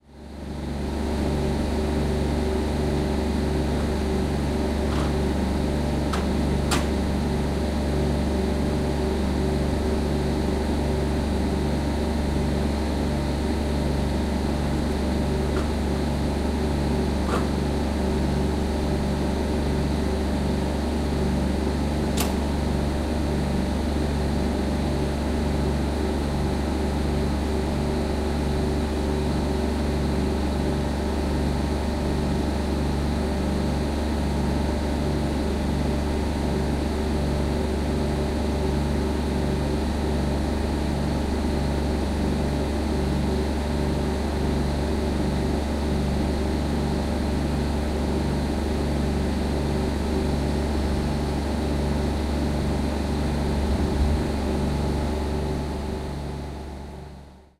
02.08.2011: third day of the research project about truck drivers culture. Neuenkirchen in Germany. Fruit-processing plant (factory producing fruit concentrates). We are waiting for load. At the back of the factory. Sound of huge refrigerating machine.